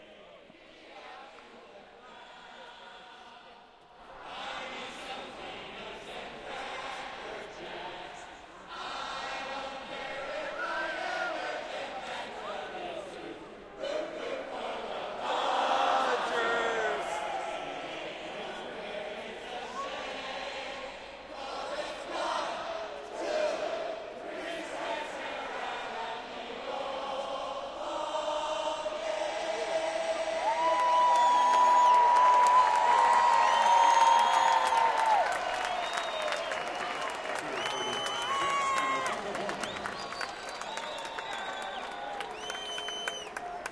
The crowd at Dodger stadium singing the traditional song "Take me Out to the Ball Game" with some hometown spirit.
crowd, sports, applause